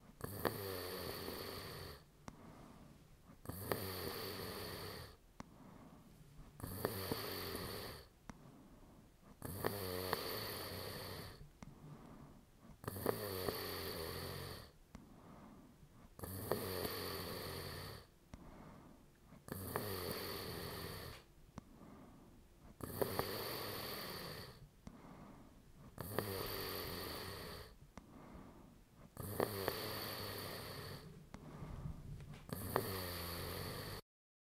Actual person sleeping and snoring. Not fake.